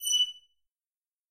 Shiny Object Of Value 💎😍
If you enjoyed the sound, please STAR, COMMENT, SPREAD THE WORD!🗣 It really helps!
More content Otw!
reward, loot, shiny, cartoon, game, item, diamond, find, found, artifact, value, box, chest, movie